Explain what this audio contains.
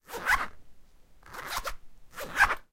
A wallet zipper being closed and opened. The high pitch of the sound relative to other kinds of zippers comes from the object being small and made of canvas, providing more resistance for the fabric. Recorded next to a Sony PCM-D50 recorder.
aip09, backpack, close, wallet, zip, zipper